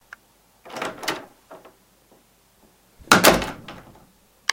A recording of someone opening a door and forcefully closing it, either out of carelessness or anger. I have left a large amount of blank space in between the opening and closing of the door so that if you want to have it, it is there. But it can always be taken out.